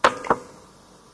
Unintentional noise collected editing audiobooks home-recorded by voluntary readers on tape. digitized at 22khz.